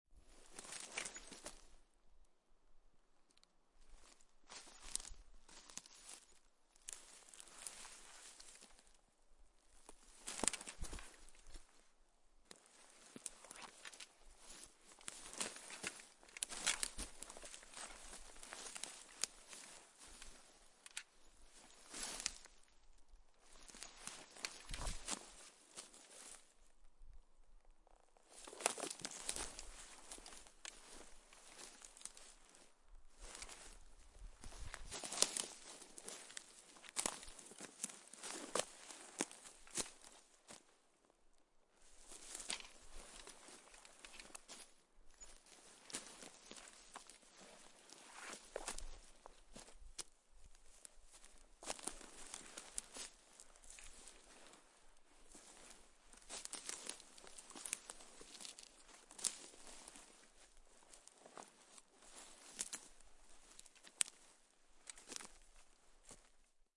pine-forest--ww2-soldier--impacts-twigs
Soldier in World War II gear moving (various body hits) in a Finnish pine forest. Summer.